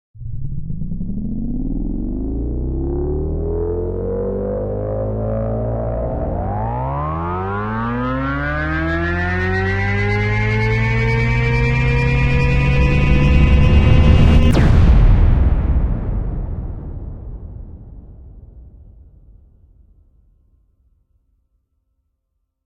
{UPDATED} warp-speed
digital, sci-fi, sfx, spaceship, strange